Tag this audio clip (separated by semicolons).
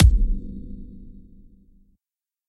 kit; drum; samples